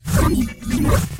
used for a quick character teleportation
teleport, open-close, teleportation, laser, portal